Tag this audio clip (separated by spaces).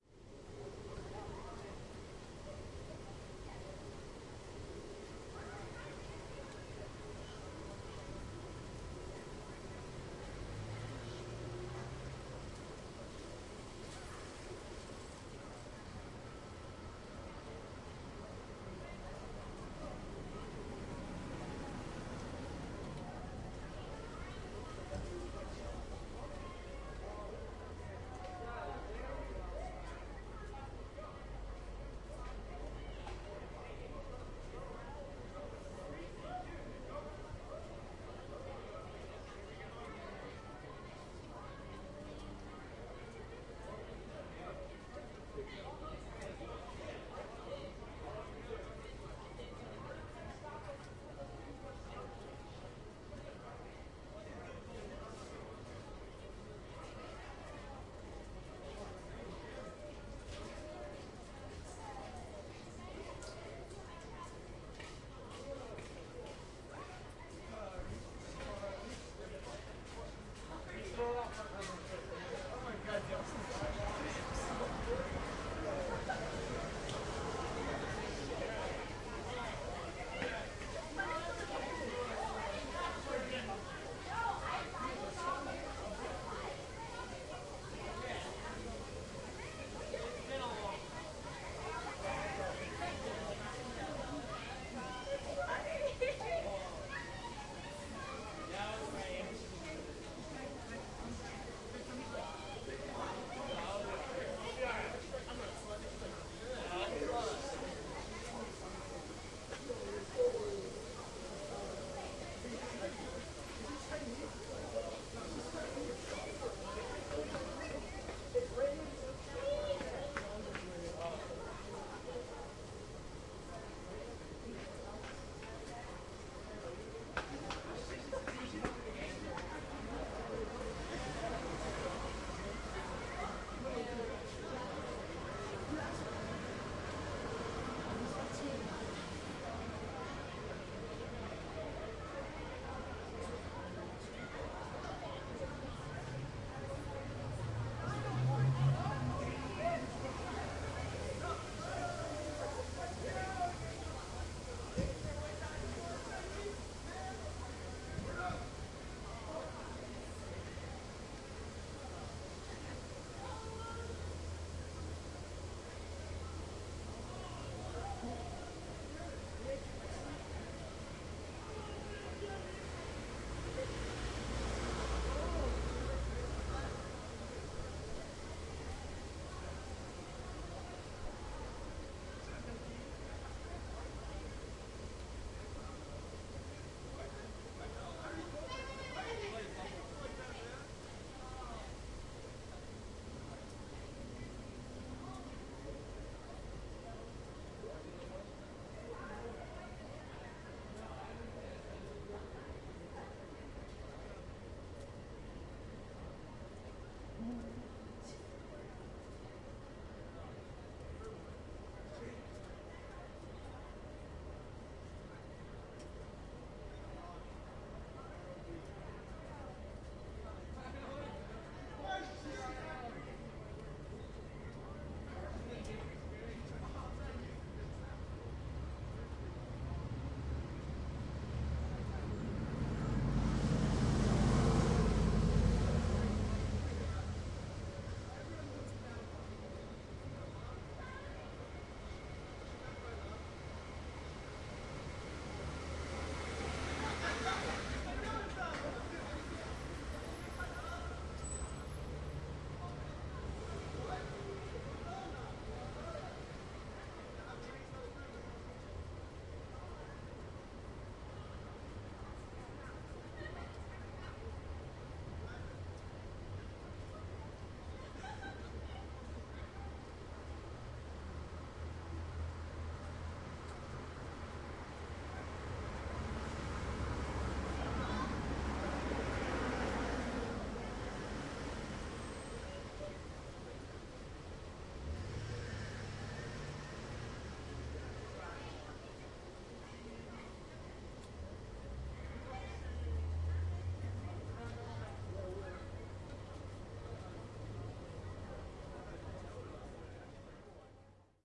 field-recording party conversation traffic laughter